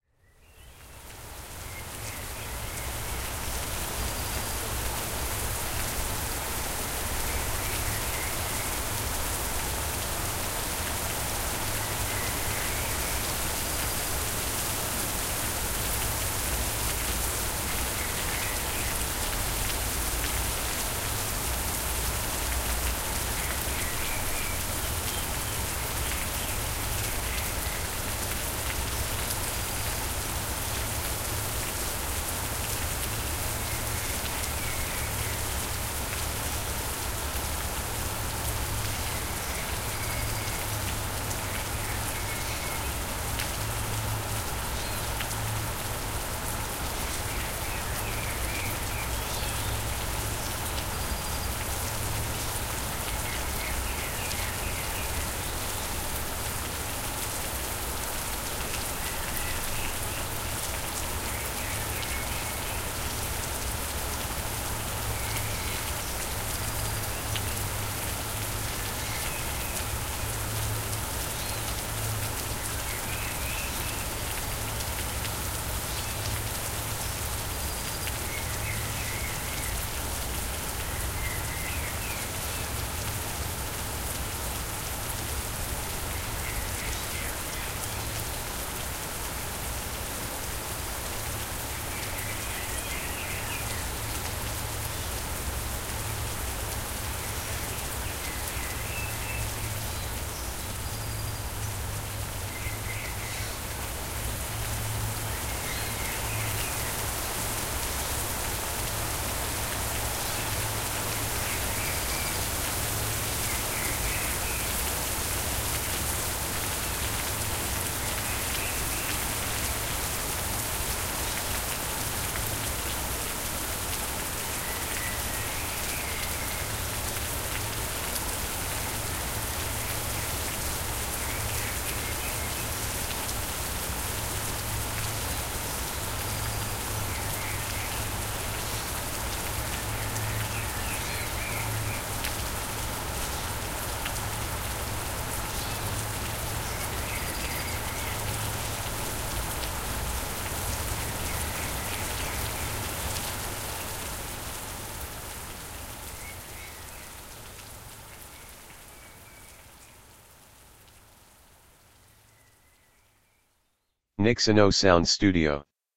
Rainy forest ambience sound
1 rain line + 4 forest sound line
rain recorded by Blue spark mic and Zoom sound card
plugin (reverb, saturn, EQ)